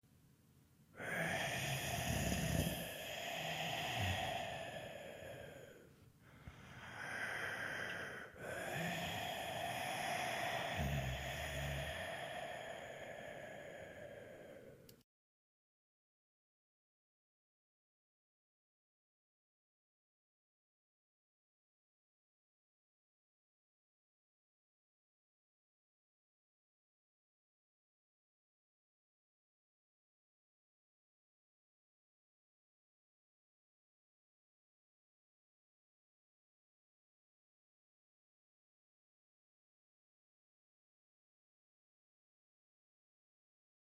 Ghost Snoring 1
Ghost sounds effects.
Hi friends, you are welcome to use this sound in any way you like.
Come and join our community of sharing creative ideas by visiting my
ghost, eerie, horror, creepy, scary, spooky, monster, demon, scary-sound, haunted